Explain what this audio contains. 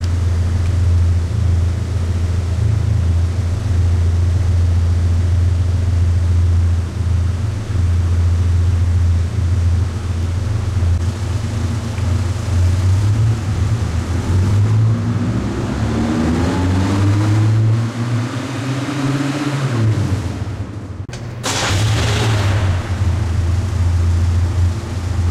Chevette idle,accel,start
A recording of a 79 Chevette as it idles, accelerates, and starts, in that order. May be cut into 3 parts.
acceleration, car, chevette, engine, idle, start